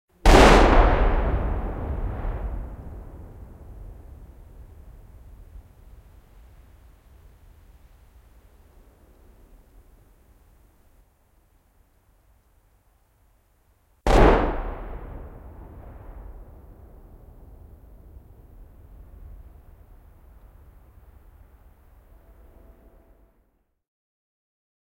Kaksi kaikuvaa laukausta etäällä. (Obuhff, 305 mm, venäläinen tykki, vm 1911-1915).
Paikka/Place: Suomi / Finland / Helsinki, Kuivasaari
Aika/Date: 06.12.1997